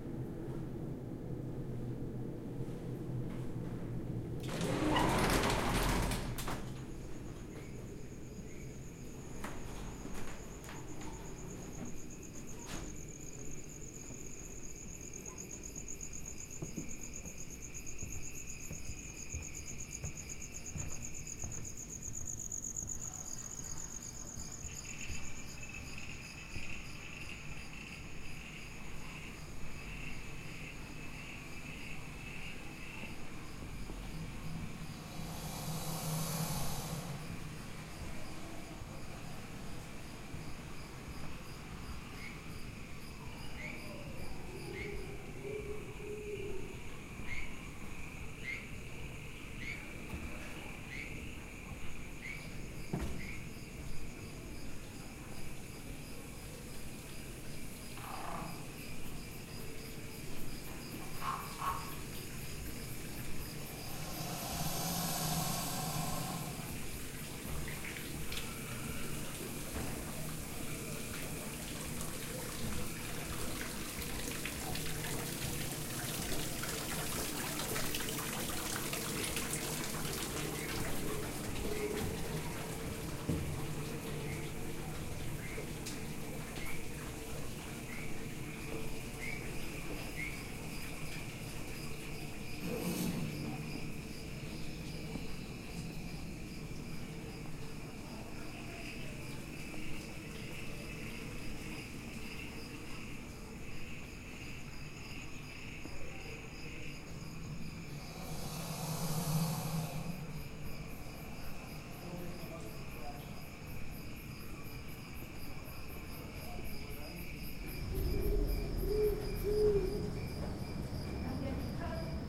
Me walking through the swamp exhibit in the swamp in the Kingdoms of the Night, without footsteps.